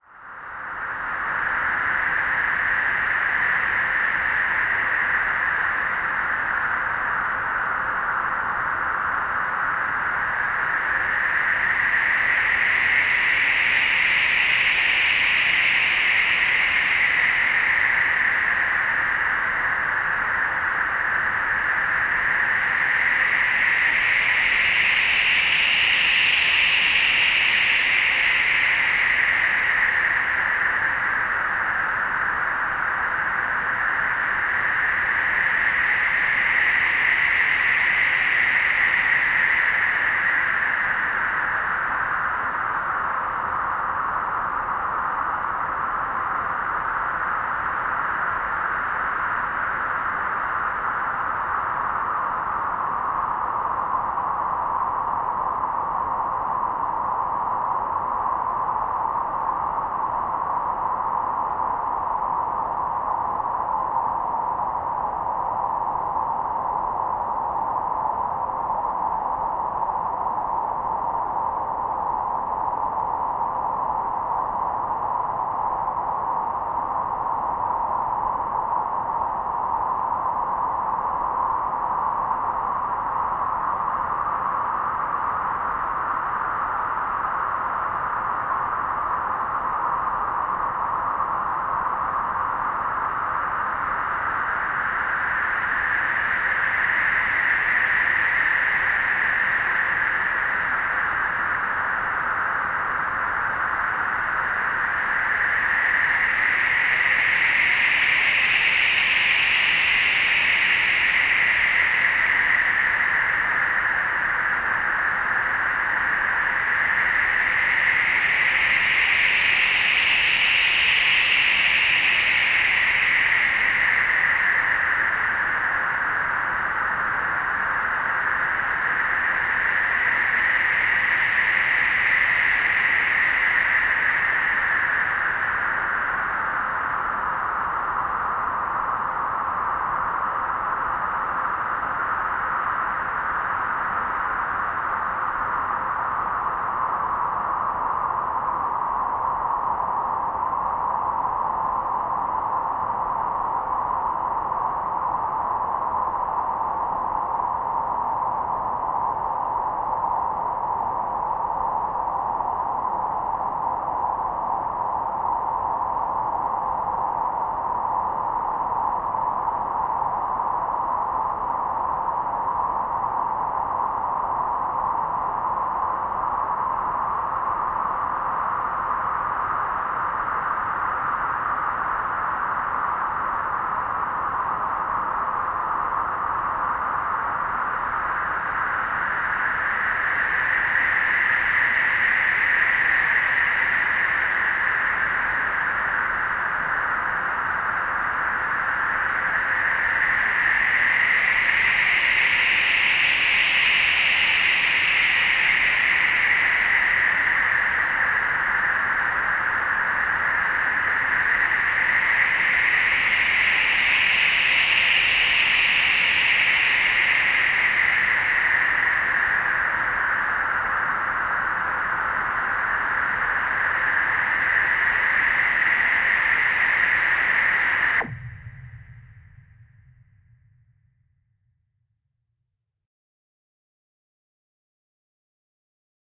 I used 2 low frequency operators (LFO) on a Low Pass Filter (24db) on a generic pink noise sound with medium resonance settings, one of these LFO's was manipulating the other one with a random ramp, to make it sound more diverse and realistic. I put an aditional long envelope on the q (resonance) also, not to mention some chorus at a low tempo to make it kinda binaural with a stereo delay at a short time setting... I hope you enjoy it!